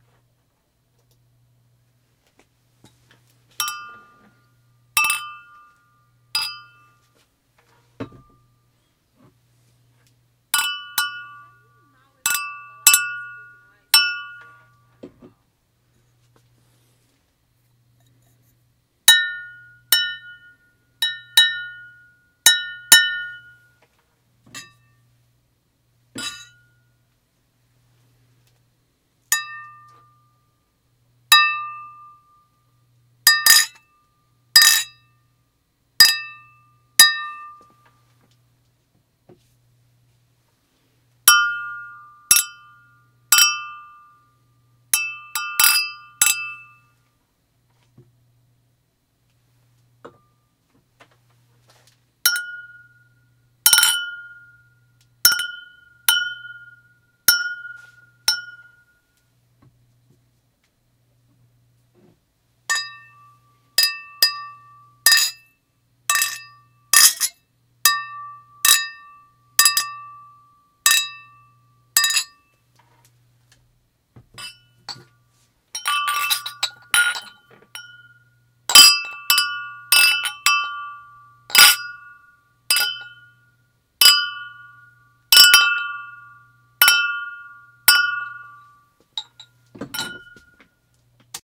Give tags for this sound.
brinde tacas tim toast

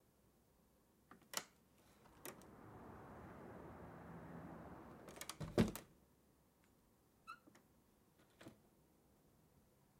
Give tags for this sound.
open door close

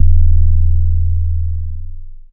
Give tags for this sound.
bass subbass sine sub

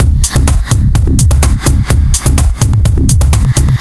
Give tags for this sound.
beat dance dark deep electric electronic house loop remix techno tekno tribal underground

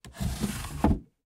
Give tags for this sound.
user-interface wooden fantasy

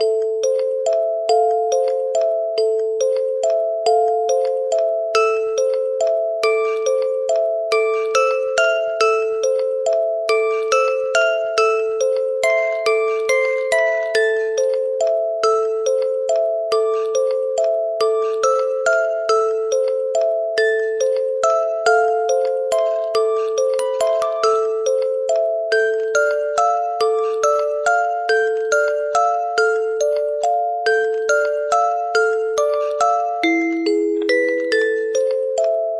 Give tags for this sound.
creepy loop music music-box

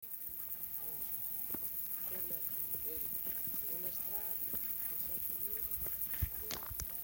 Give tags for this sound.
cricket crickets field-recording insects mountain nature summer

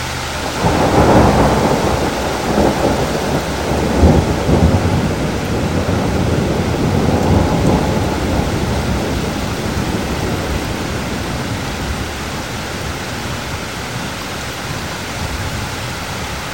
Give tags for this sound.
RAIN NATURE STORM WIND THUNDER

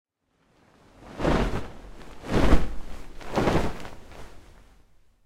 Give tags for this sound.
Ship Blanket Sail

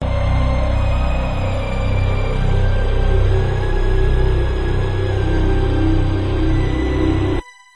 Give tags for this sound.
Ambient Altering Gloomy Strings Movie Horror Slasher Dark Scary Film Mood Pad Spooky

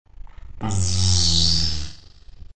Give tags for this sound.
swoop passing ray phase flange scifi drive-by lightsaber buzz plane distorted science-fiction

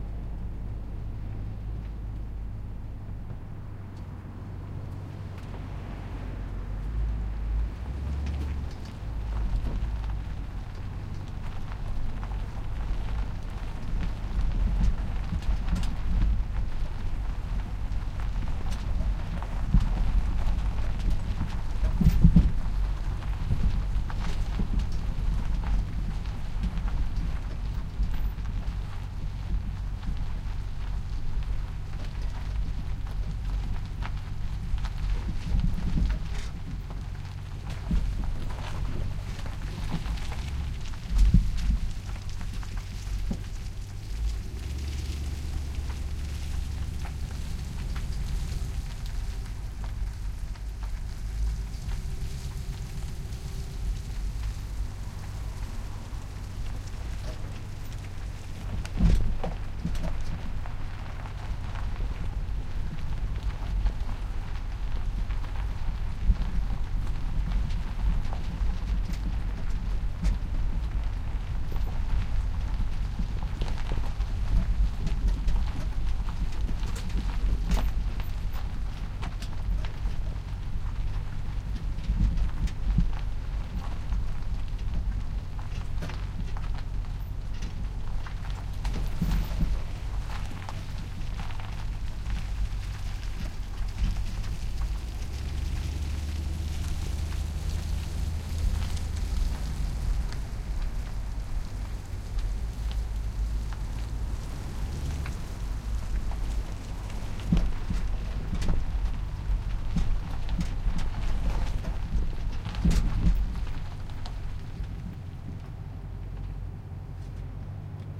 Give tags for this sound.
gravel car truck drive engine driving

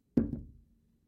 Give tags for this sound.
drop hit light small subtle thud

